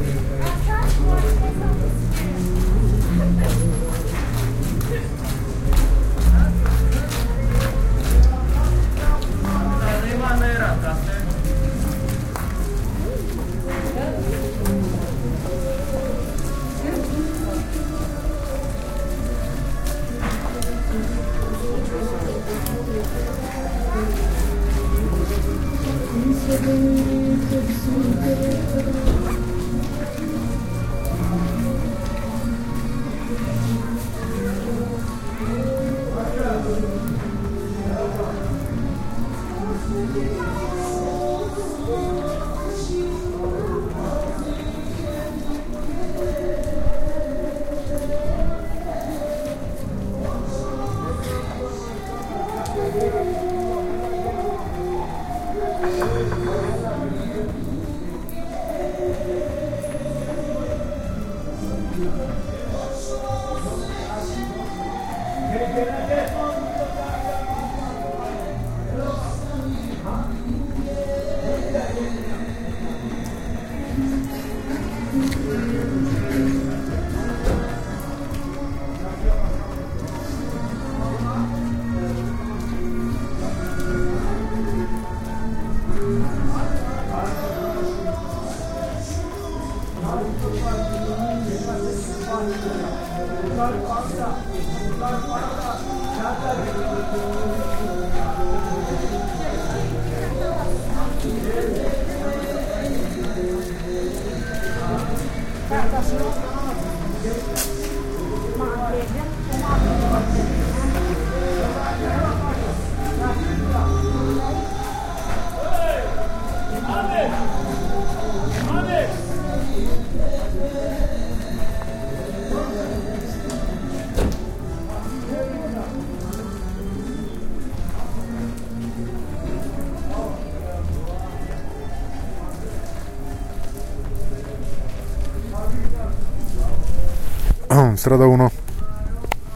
street sounds plus music
Nadezhda district, Sliven, Bulgaria
This is an important Roma "Ghetto" in Bulgaria.